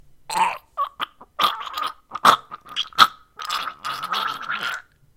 Sound of someone trying to breathe or speak after having throat cut